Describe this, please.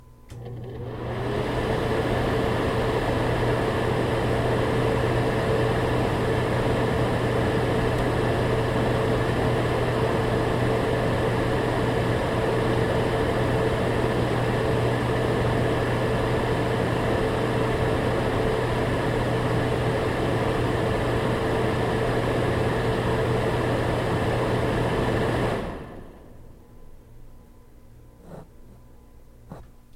Exhaust fan with startup recorded with laptop and USB microphone in the bathroom.
fan, noises